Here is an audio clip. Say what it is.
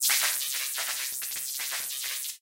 My 1st contribution here :)
Hope you find it useful. Made in NI Massive as an experiment.